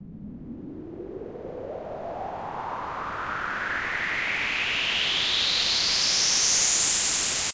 Simple white noise sweep made on FL Studio 10
effect, fx, noise, sfx